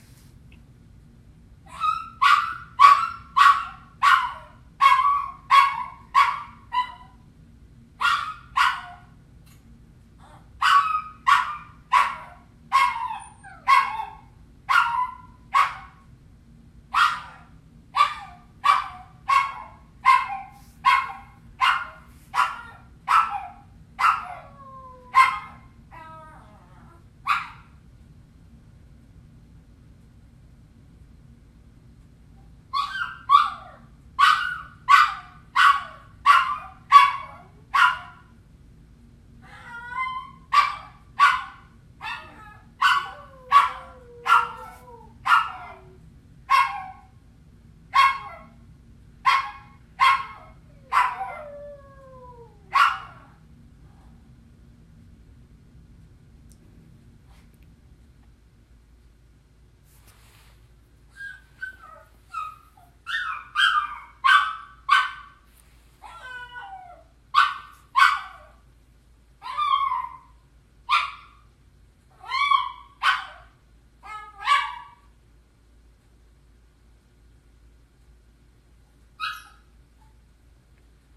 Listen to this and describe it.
Dog Whining 2
This is the sound of a 10 year-old small, male Poodle/Chihuahua mix that is whining. Another dog can be heard sympathetically whining a couple of times.
wailing, animal, pet, dog, barking, whining, crying